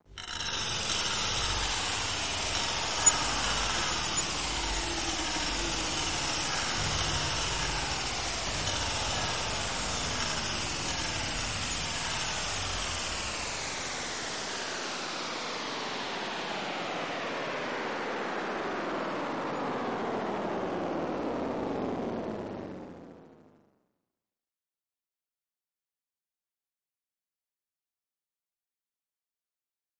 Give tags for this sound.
hits; efectos; effects; sonido

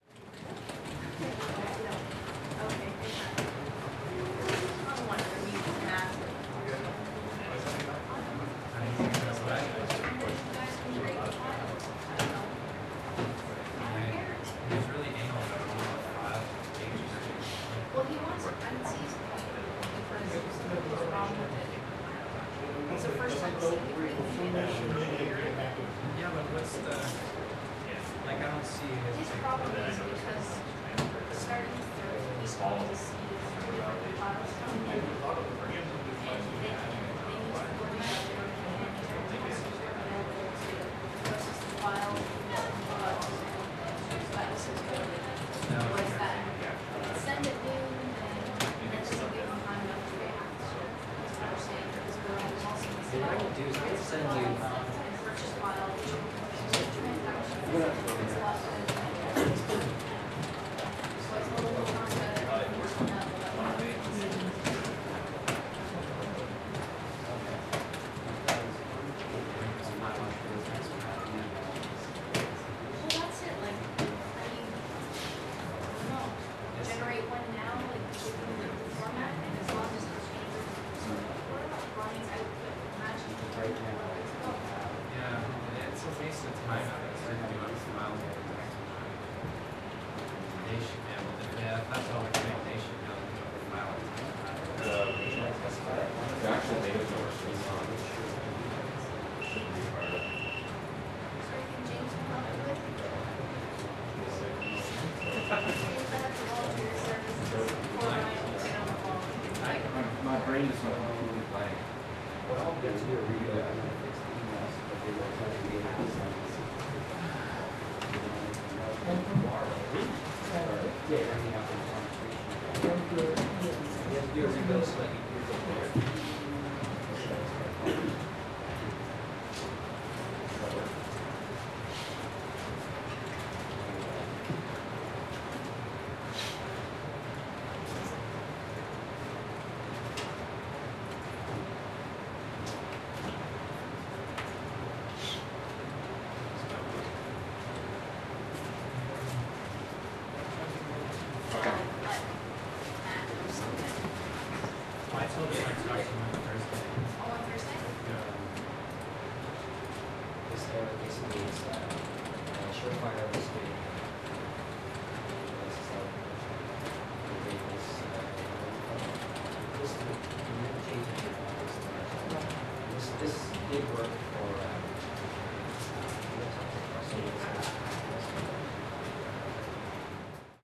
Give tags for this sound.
Chair; Squeaking; job; Office

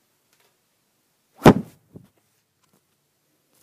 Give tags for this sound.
slam; smack; punch; slap; thud

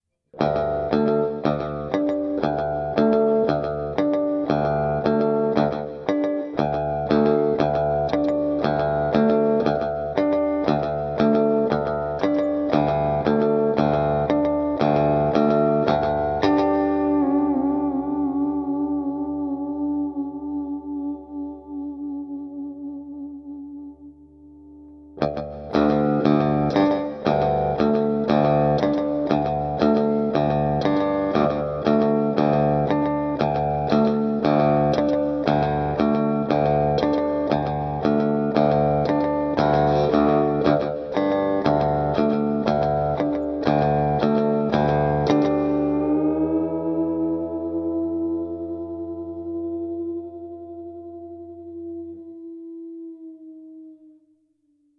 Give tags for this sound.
background; clean; country; cowboy; electric; Filteron; Floyd; guitar; Harmonics; noise; reverb; rockabilly; Rose; spaghetti; western

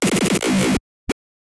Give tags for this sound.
k; love; e; fuzzy; t; processed; o; deathcore; l; glitchbreak; y; pink; h

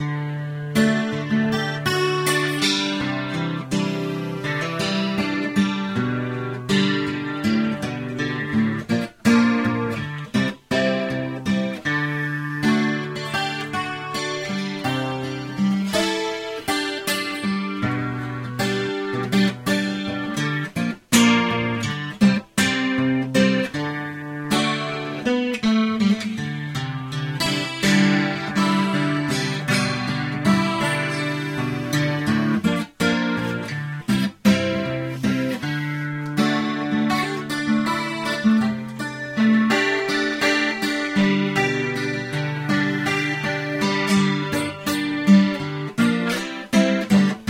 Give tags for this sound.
acoustic; fraendi; groovy; guitar; lalli

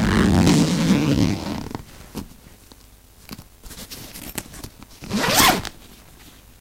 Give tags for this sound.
bag; zipping

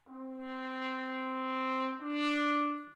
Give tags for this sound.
brass; c4; d4; french-horn; horn; mute; muted; tone

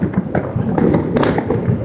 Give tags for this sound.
boom; destroy; bang; fireworks; explosion; wide; firework; long; ambient; fire-works

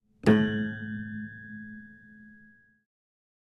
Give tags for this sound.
FX; Long; hz; freq; Harm; Harmonic; Pizz; Nodes; Decay; Snickerdoodle; 440; Pizzicato; A; Piano; Upright; Sample

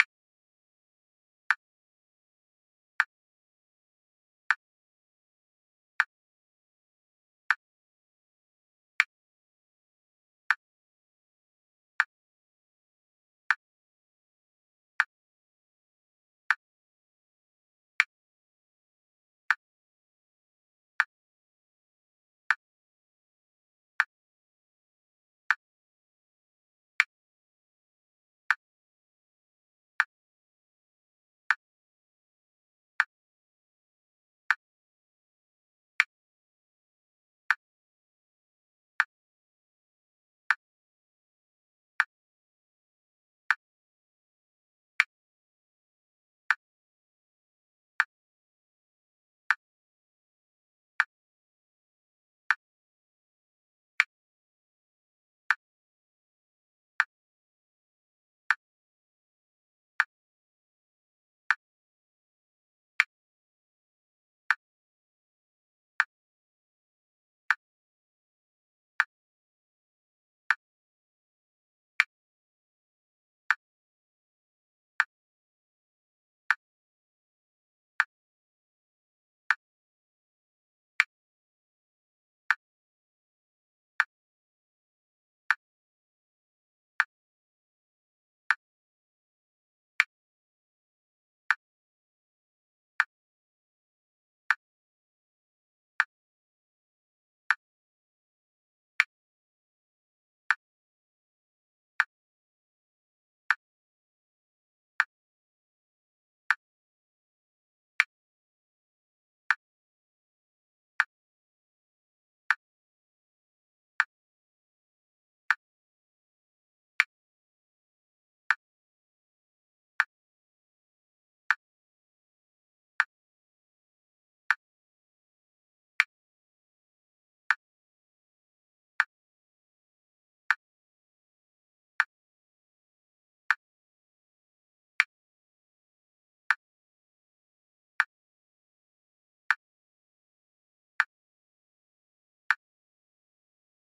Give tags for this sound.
six-beats; audacity

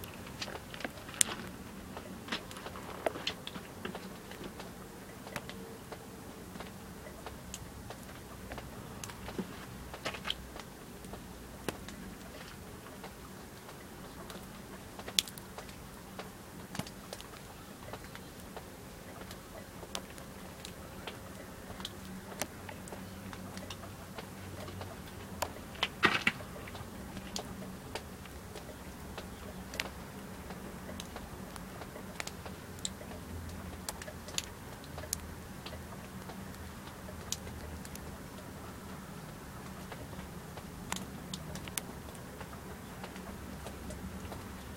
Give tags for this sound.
water; splash; melt; pling; drip; stone; ping; field-recording; drop; snow

